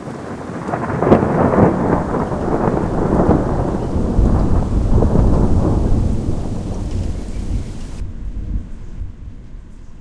long thunder edited
different thunders edited together. made for a show.